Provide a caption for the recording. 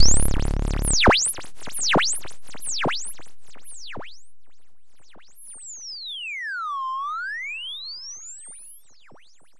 A long electronic effect simulating outer space radio signals. This sound was created using the Waldorf Attack VSTi within Cubase SX.